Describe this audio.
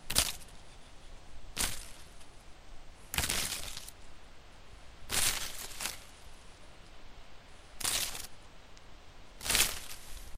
Rustling through a small pile of leaves a few times.